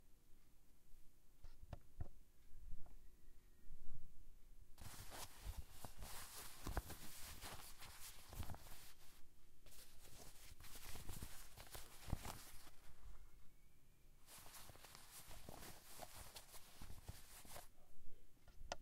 This is a Clothing Rubbing Foley Sound, emulates the sound of couch moving, chair adjust, writing on table, moving around in clothing. This in efforts of bringing you easy to use sound that is both editable and low in noise. Good luck filming.
movement, Scratch, Clothing, Foley, couch, Leather, sounds, fabric